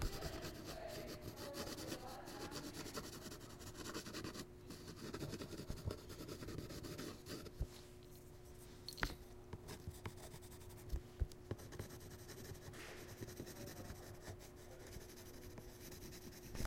Colouring(Close)
An artist colouring in a piece of art with coloured pencils.
(Up-close/Intimate Perspective)
/Stereo Recording
drawing, art, pencils, colouring, color, scribble